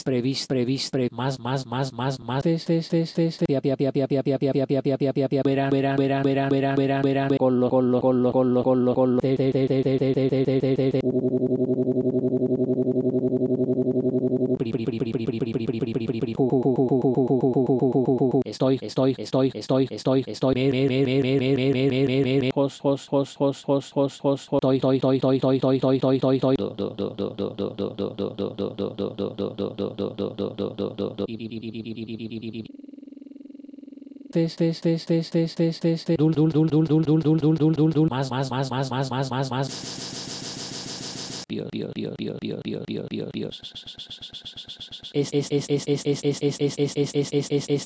04-rapping.single
mix, syllable, male, spanish, experimental, voice, rapping, random, rhythm